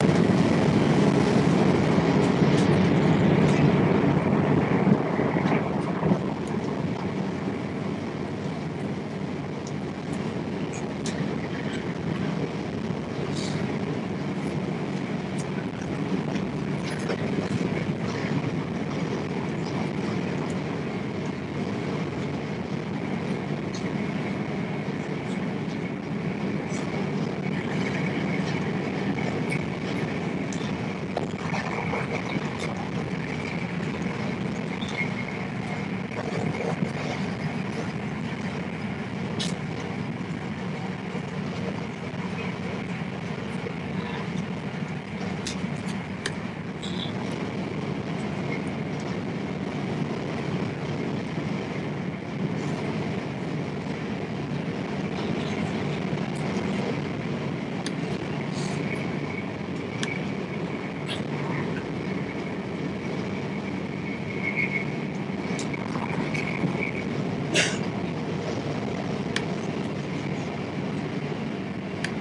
noise background NS 7XXX Intercity train inside
Recording from inside a NS 7XXX with wagons intercity service. In the neighborhood from Nijmegen, The Netherlands
7000, background, computer, dutch, inside, intercity, nederlandse-spoorwegen, noise, ns, railway, recording